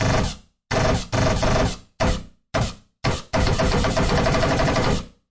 archi gunshot scifi 01
Sci-fi gunshots mostly using KarmaFX.
scifi, shooting, gunshot, science-fiction